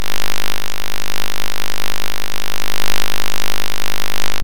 Made this sound in Wavelab using the Audio Signal Generator function and crossfaded the ends so that it can be looped over. It's meant to sound like a dodgy power socket or something like that.
looped, synthesized, hum, electricity, dry